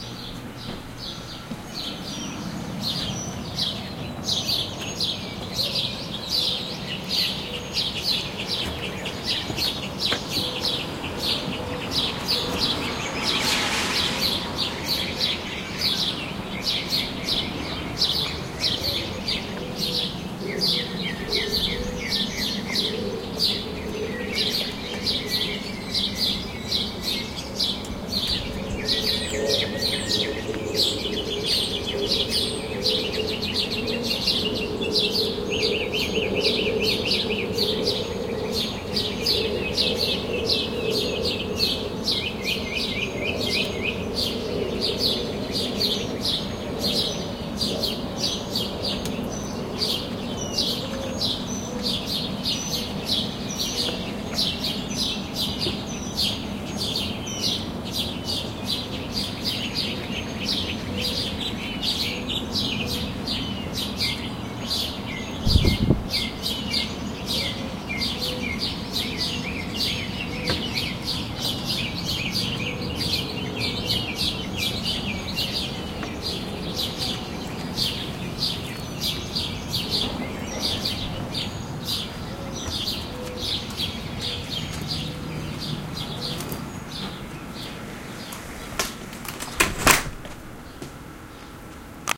Birds From A Balcony At Sunrise In Denton Texas [032912]
This audio was recorded from my balcony in downtown Denton, Texas near the University of North Texas at 6:30AM in the morning, just after the sun had risen. All sorts of birds can be heard in the nearby trees. A car drives by in the distance, splashing through a puddle, and at the close of the recording, I walk inside, shut the door, and turn off the Sony ICD-UX512 Voice Recorder.